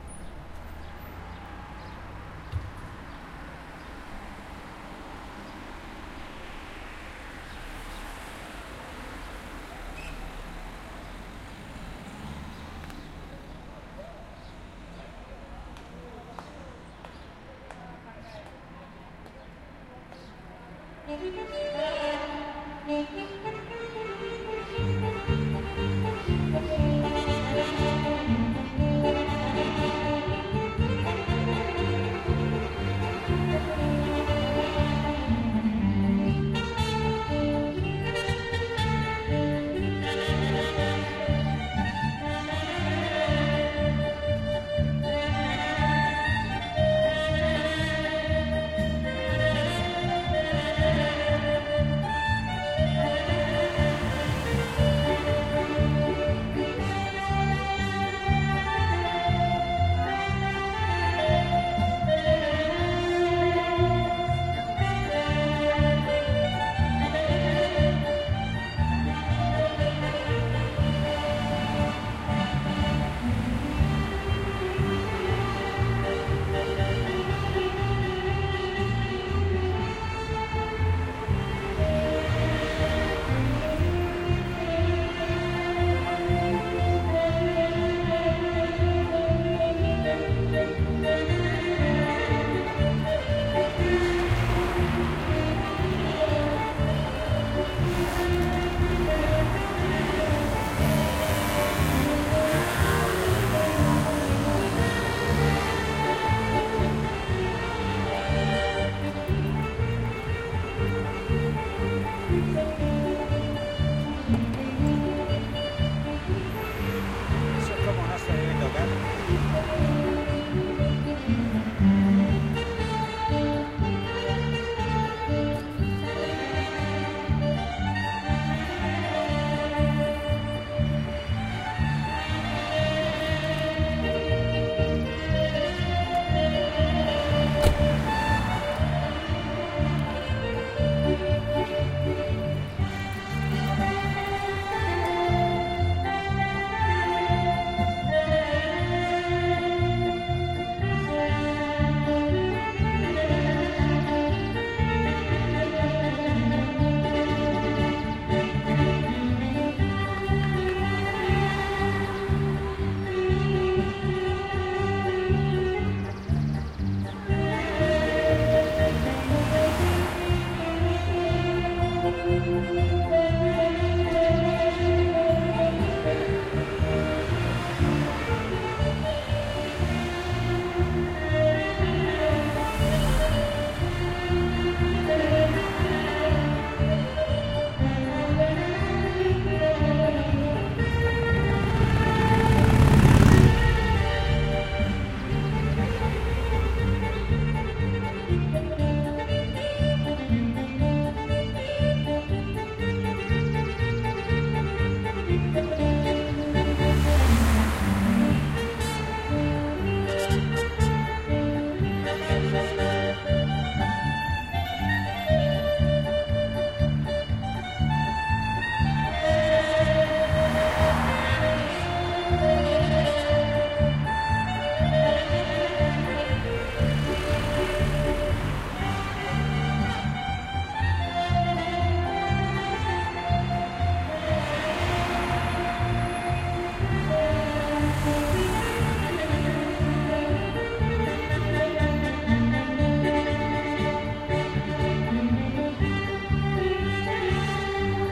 0203 El gitano la cabra y la trompeta 3
Traffic and people talking. Gipsy street band.
20120324